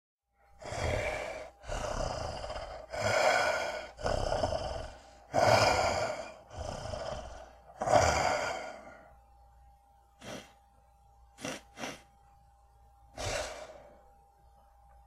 Snar Sniffl
A creature snarling and sniffing the air. Made for a werewolf audio drama.
monster, growl, smell, creepy, creature, creatures, scary, sniff, snarl, horror, werewolf, beast, beasts, terror